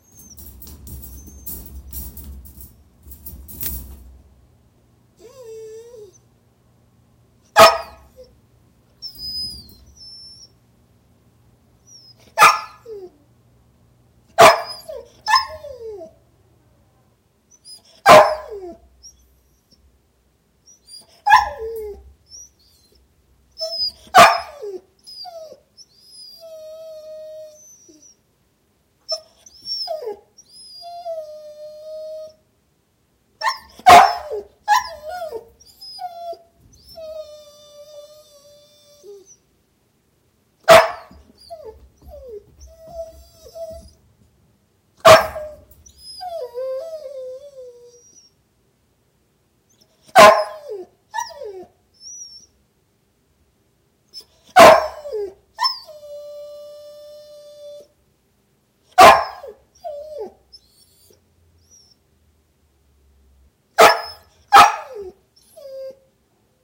Young dog barking and whining in his crate
It's FINE. He's just upset because we went two seconds without playing with him :D
whining, cry, barking, howl, sad, golden-retreiver, dog, whine, crate